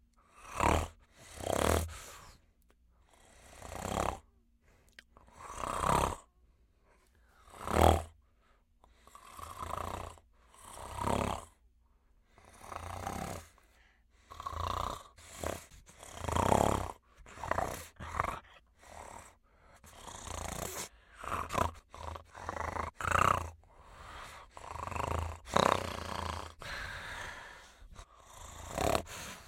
Velociraptor Snarls
velociraptor, snarl